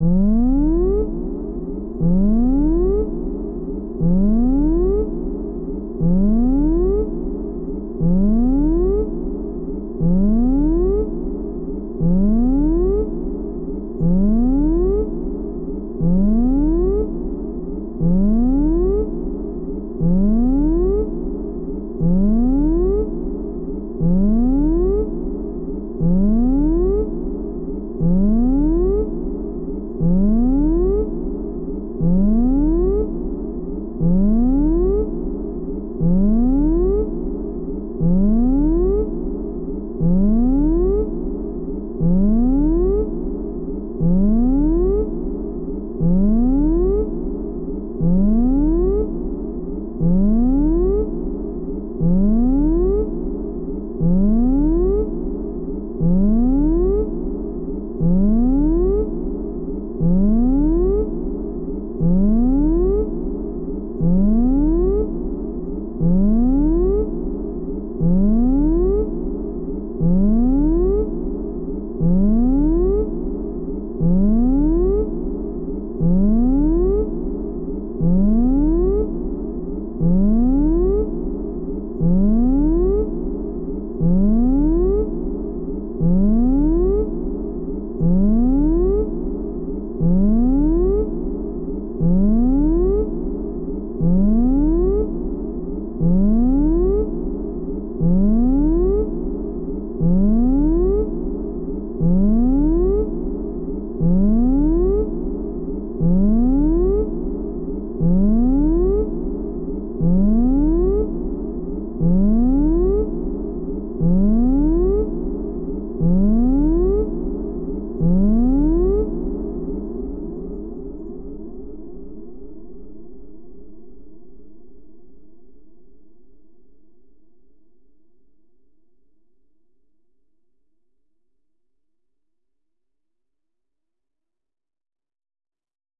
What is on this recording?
An alarm created with the help of a Korg DLC M1, some different delay effects and compressed. An apocalyptic alarm telling you, urging you to evacuate as soon as possible.